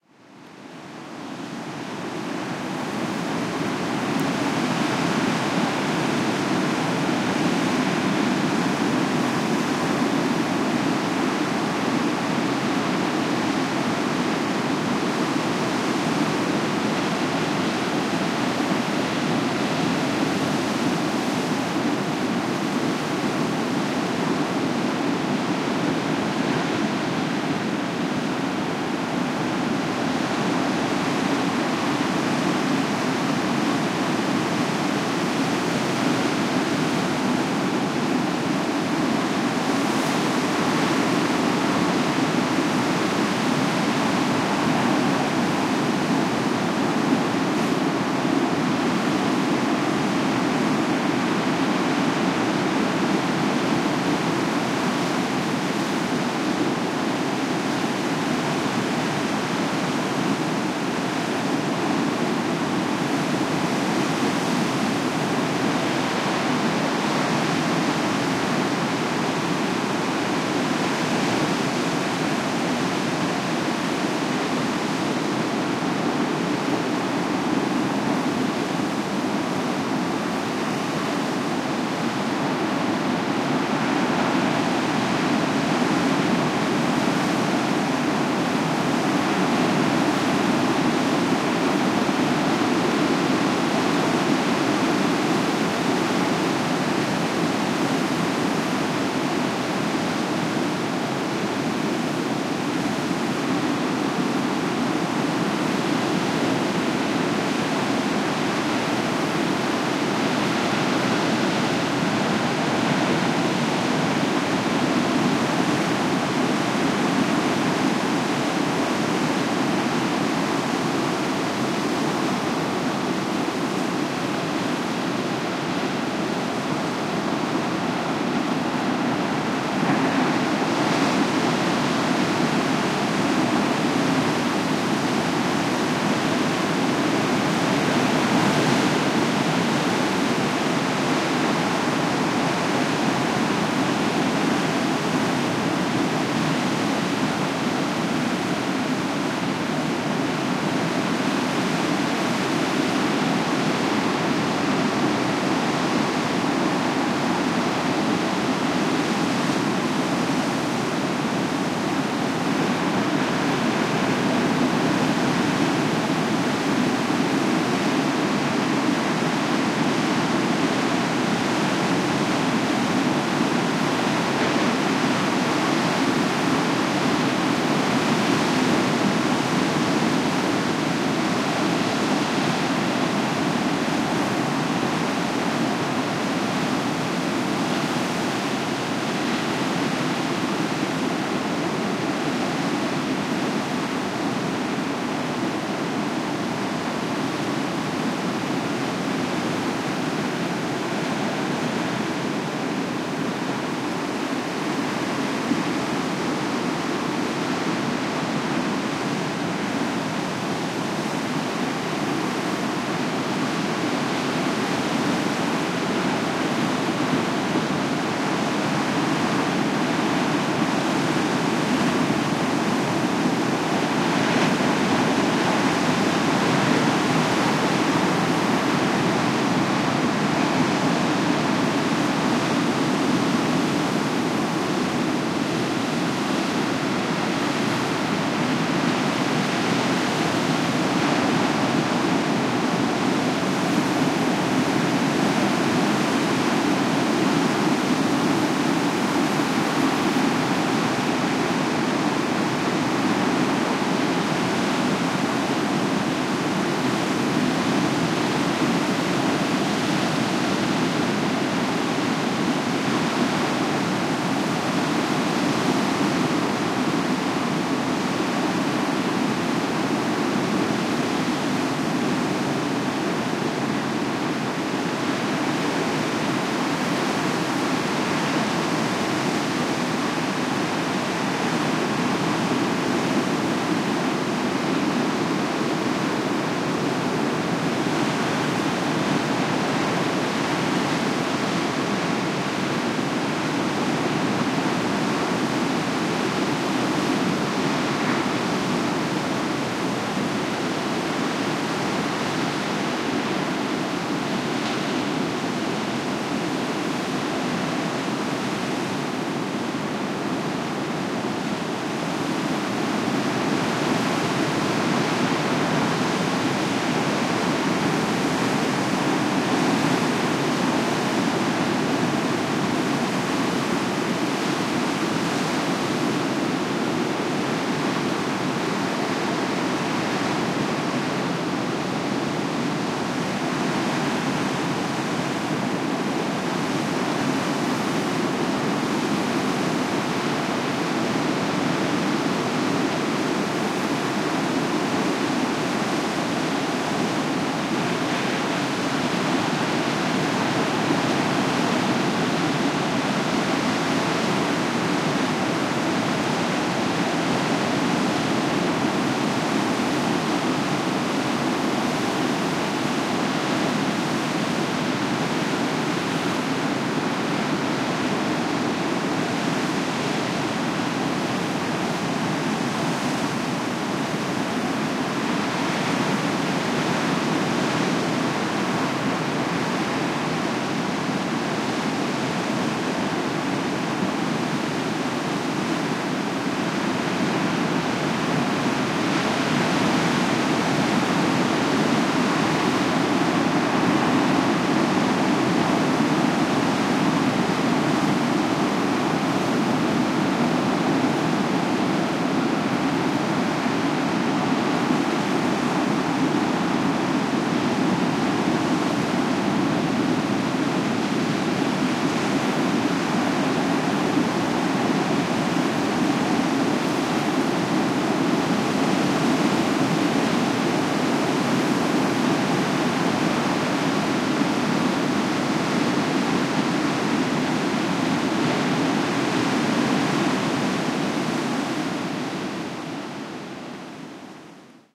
Ano Nuevo Pacific Coast Waves
Crashing waves recorded Ano Nuevo State Park at Franklin Point, California